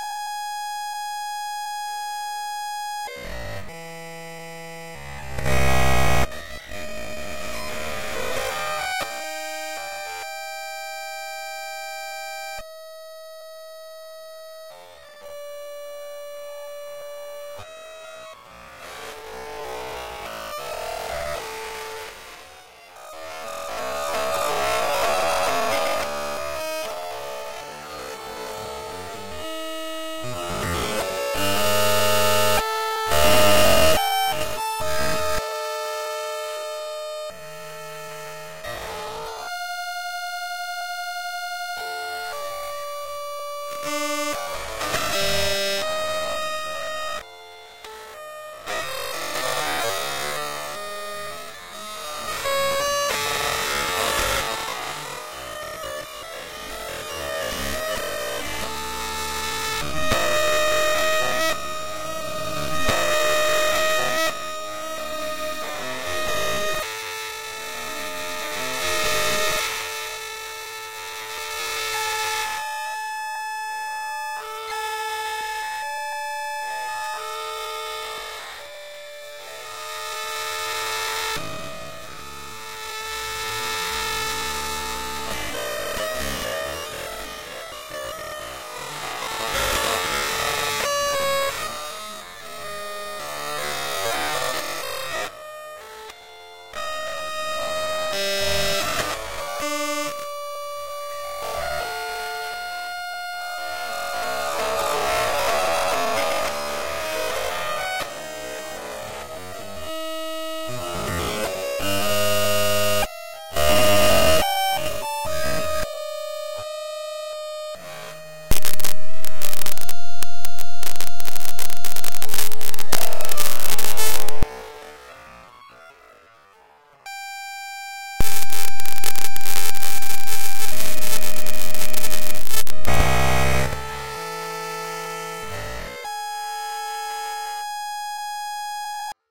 05 Me at the intensive stereocare after treatment by Dr. Stereo Phil
All systems halted (slowly developing glitch)
useless, noise-dub, noise, silly, glitch, mangled, nifty